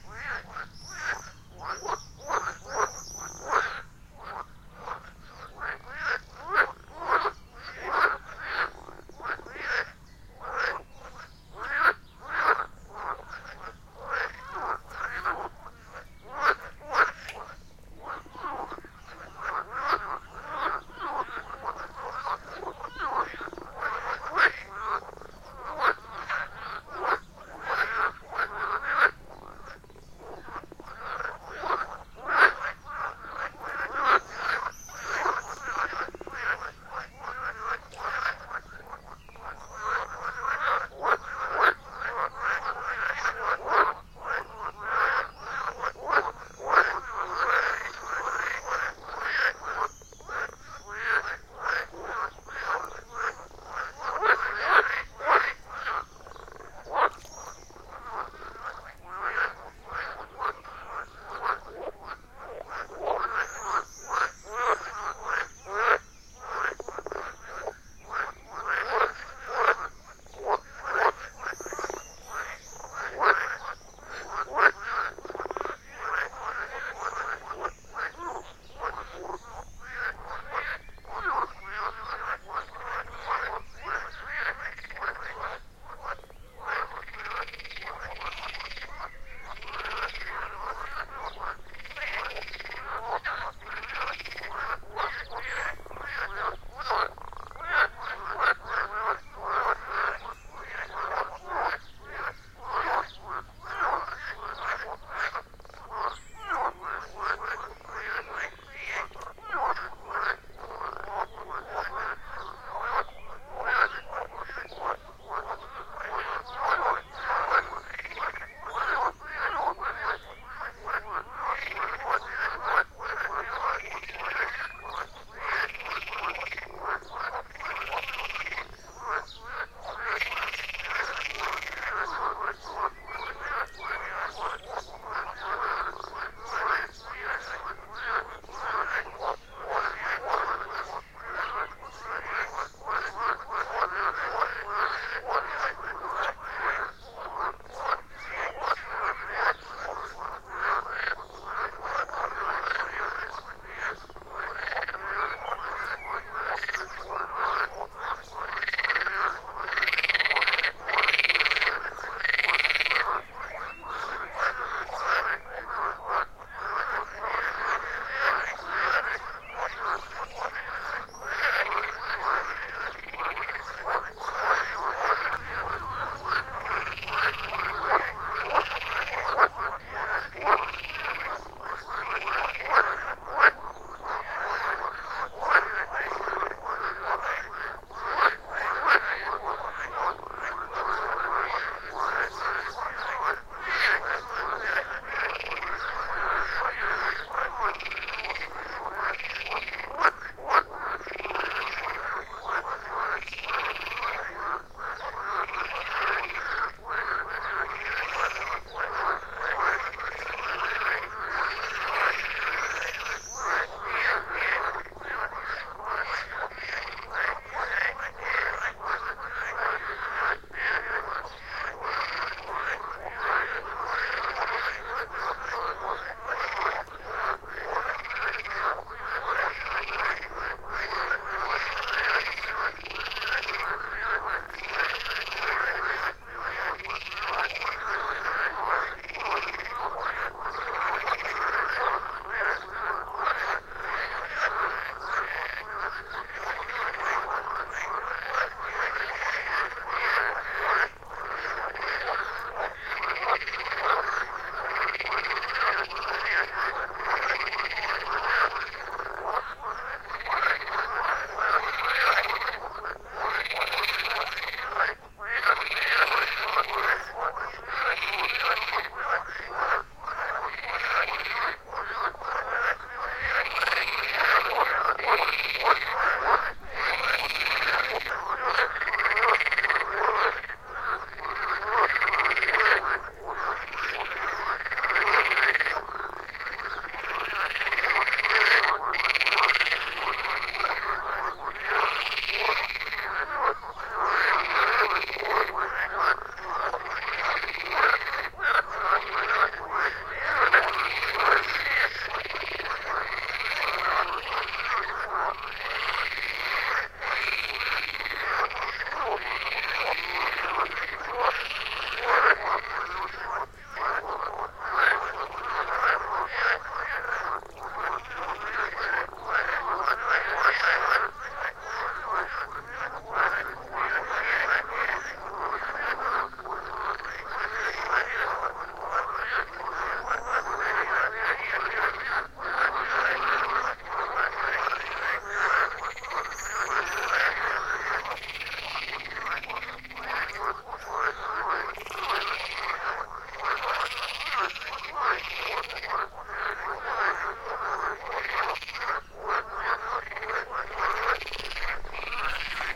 Frösche frogs Moorende 20130531
Douzens of frogs within a ditch at dusk end of may 2013, giving an evening concert. Recorded in Moorende 25 km apart from Hamburg. The ditch is a part of the old watering system of the huge apple plantages of the Altes Land. Fortunately and unusually nearly no wind during the recording and only one motorbike passing at the small road beneath - which I was able to cut off unnoticable.